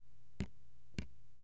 Water On Paper 05
Drops on paper.
drip, dripping, drop, drops, paper, water